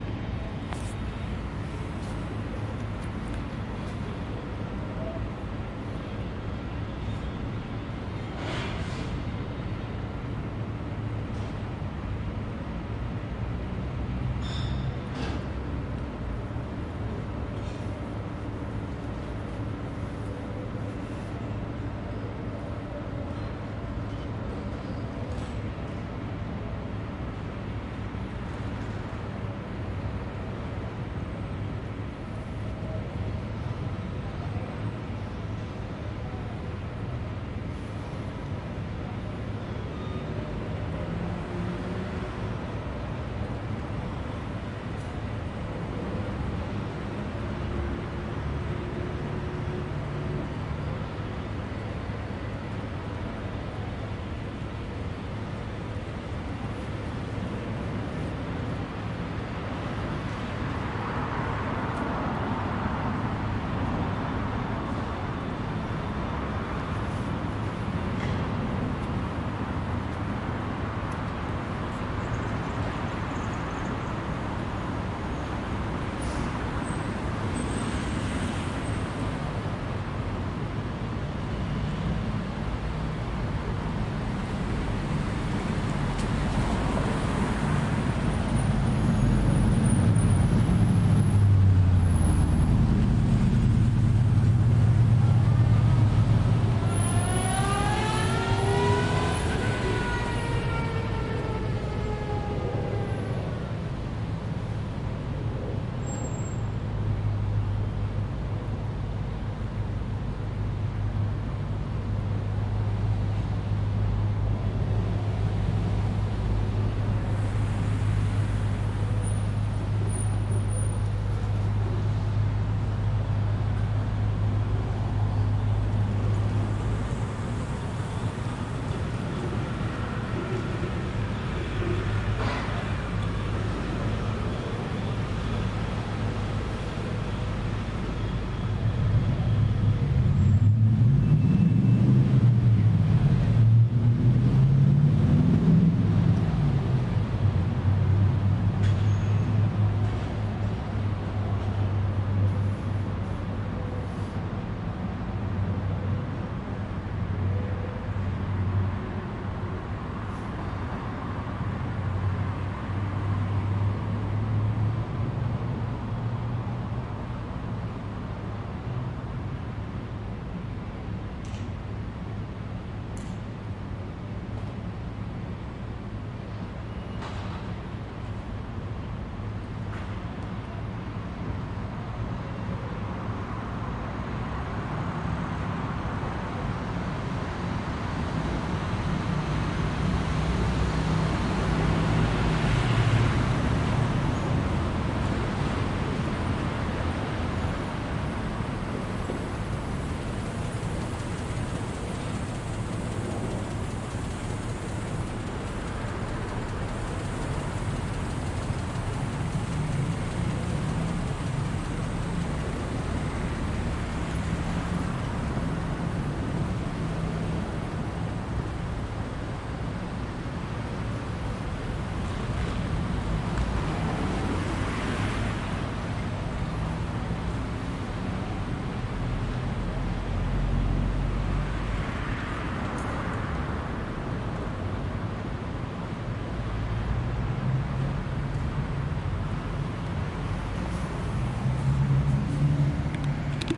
Mexico City - Alvaro Obregon 00:00 XY
Ambience Recorded with an Zoom H2N In Quad Mode (This Is The XY File)
Mexico City, Alvaro Obregon Midnight